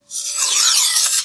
Alternate Sound of metal running along metal to sound like a Guillotine might have. Note this does not have the trademark clunk at the end so it might be used for a sword "draw".
metal, knife, guillotine, scraping, sharpening, blade